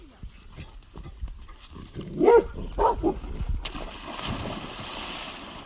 Loud dog bark with echo and splash
A big dog (Rhodesian ridgeback / Great Dane cross) barks loudly with an echo. A splash follows
echo, splash